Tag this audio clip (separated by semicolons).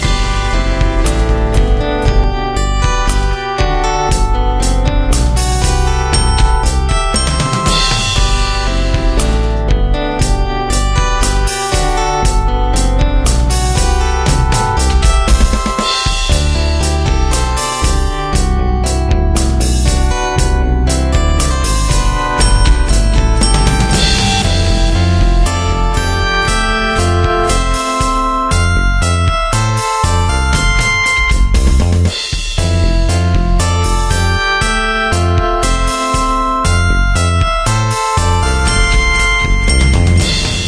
118; BPM; Bass; Drums; G-Major; Loop; Music; Synth